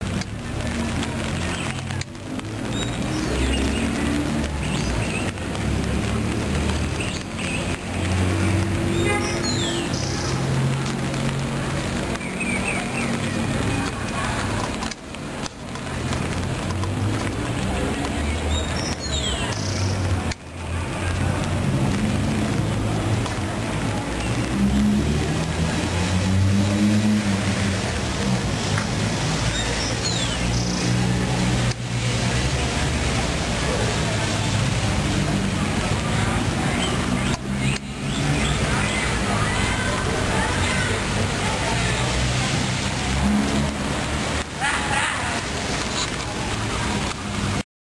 sonido capturado en un lugar de descanzo (parque) dentro de la ciudad
urbanos, sonido, naturales, sonidos, capturado